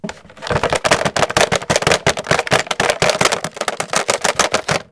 I'm shaking something that sounds plastic? Recorded with Edirol R-1 & Sennheiser ME66.